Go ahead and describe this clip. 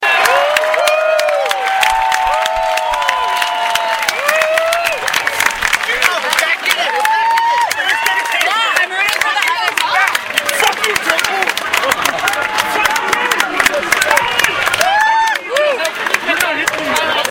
Claps after a home run.